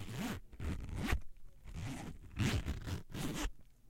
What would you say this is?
Opening and closing a zipper in different ways.
Recorded with an AKG C414 condenser microphone.
3naudio17, backpack, clothing, uam, zipper